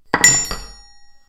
weapon drop
Fight SFX- dropping weapon to floor
over, player, death, clatter, sword, fall, drop, dying, end, impact, metallic, game, clang